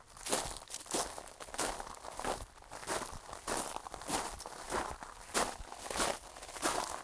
Gravel walk
A recording of me walking on pretty big, and rough gravel.
gravel, Stone, Nature, Walkcycle, Rock